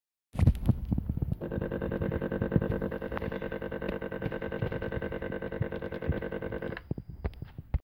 Recording of a steam iron in use